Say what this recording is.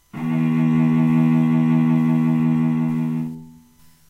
A real cello playing the note, E2 (2nd octave on a keyboard). Fifth note in a chromatic C scale. All notes in the scale are available in this pack. Notes, played by a real cello, can be used in editing software to make your own music.